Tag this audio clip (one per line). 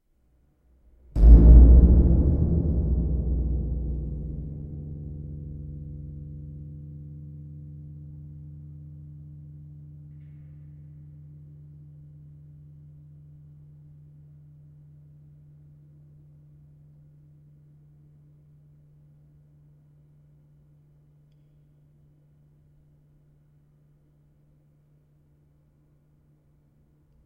thrill
moment
stinger
terror
surprise
drama
scary
terrifying
bum-bum
sting
horror
spooky
sinister
dun-dun
nightmare
creepy
suspense
scare
shock